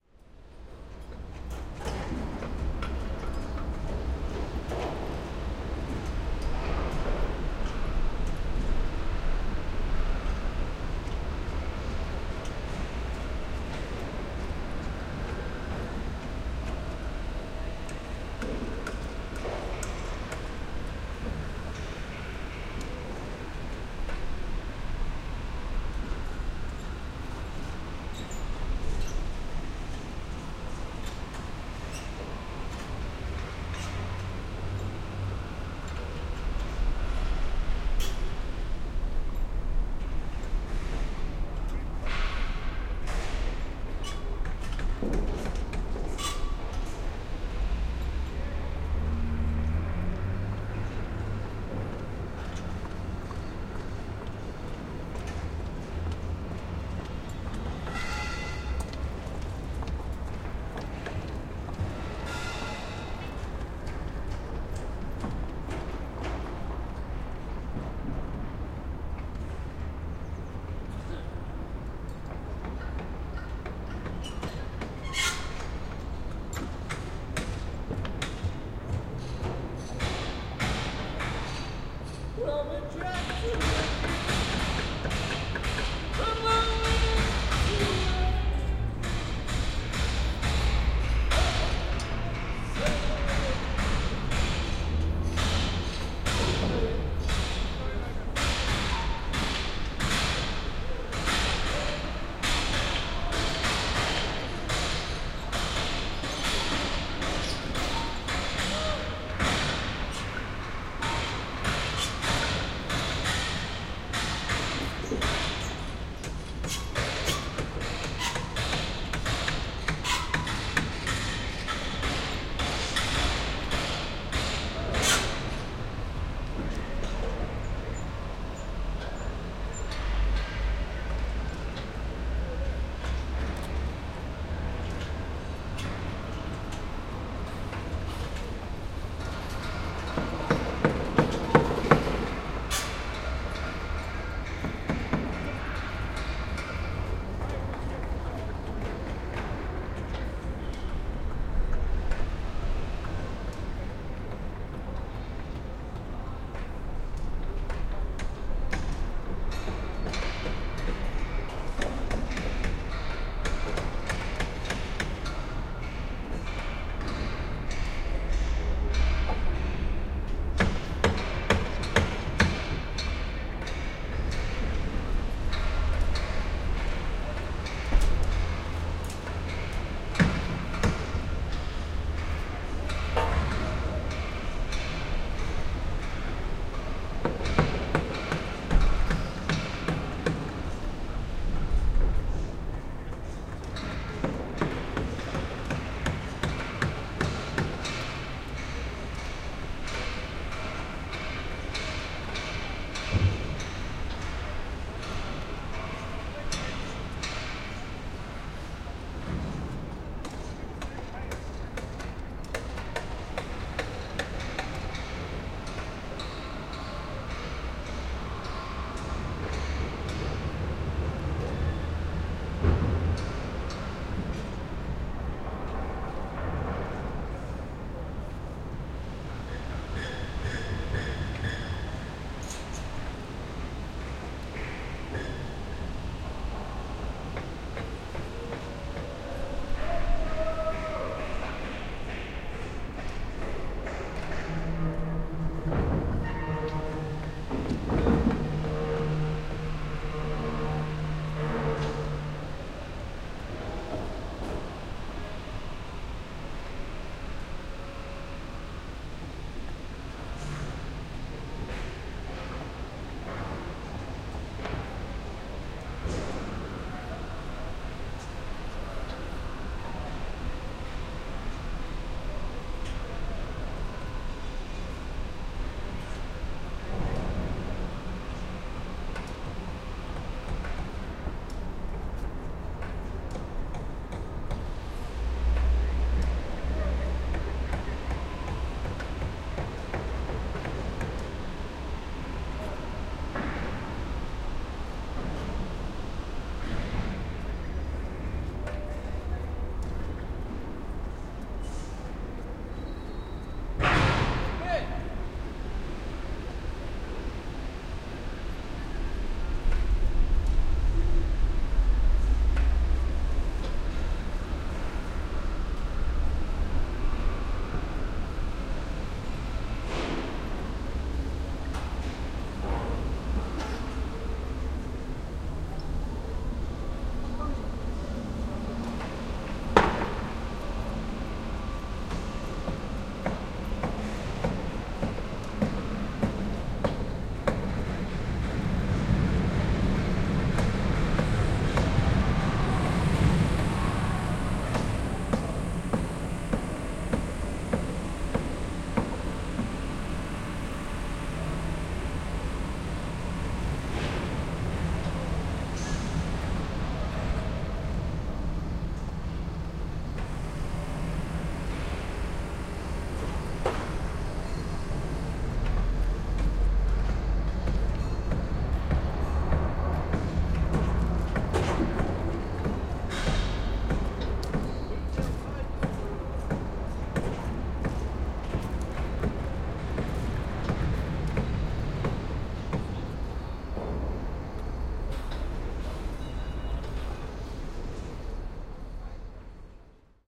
Manc Construction Site 4 MS pre-matrixed
The construction site outside my office window that's been annoying the
hell out of me for the last year, so I thought I would record it!
I recorded this with a figure of eight and a cardioid for MS, but I haven't decoded it yet so I don't know how it turned out!
construction, building, manchester, industrial, external